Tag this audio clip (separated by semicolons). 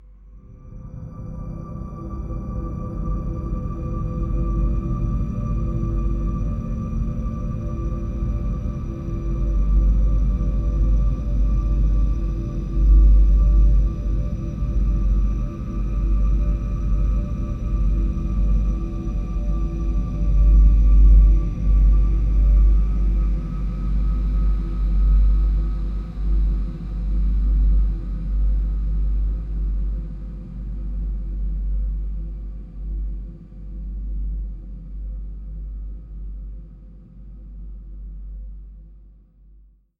cloudy; pad; space; cinimatic; multisample; soundscape